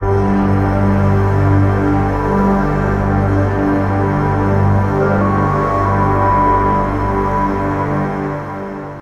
SemiQ intro 13
This sound is part of a mini pack sounds could be used for intros outros for you tube videos and other projects.
soundeffect, horror, weird, sound-effect, dark, delay, film, ambience, soundscape, space, efx, atmosphere, ambient, sound, electronic, deep, pad, drone